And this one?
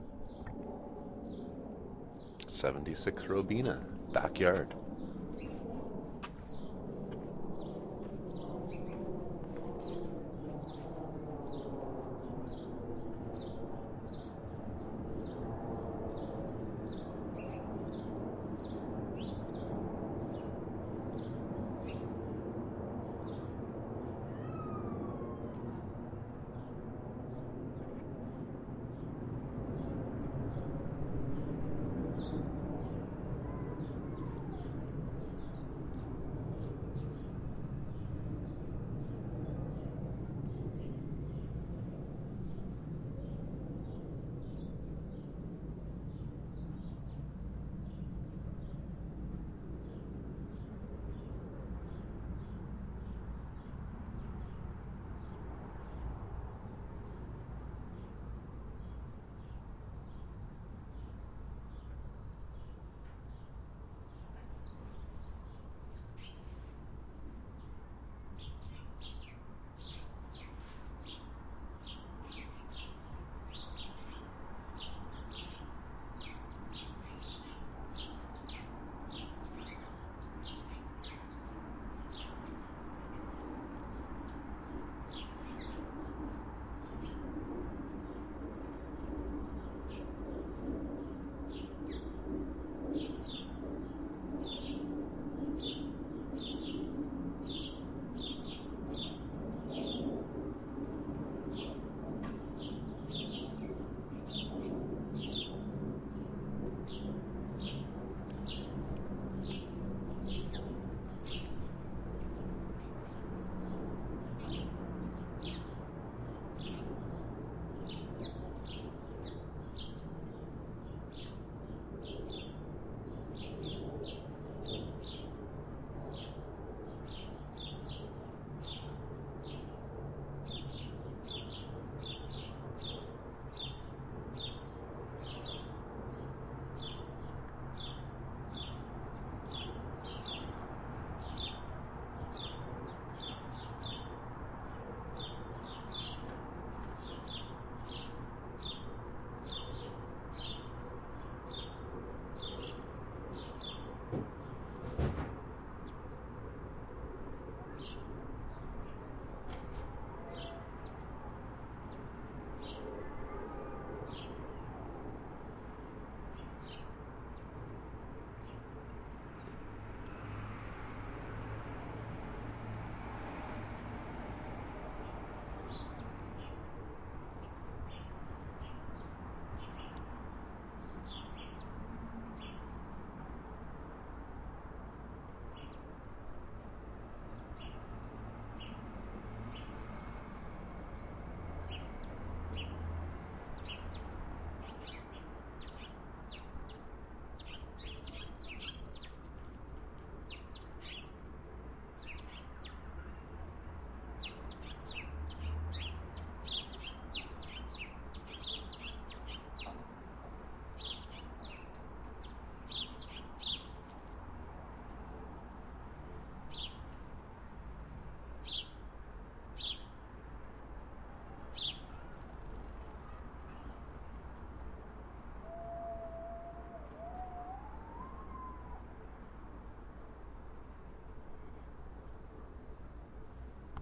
Backyard Birds and Plane
ambience, backyard, bird, clair, flightpath, st, toronto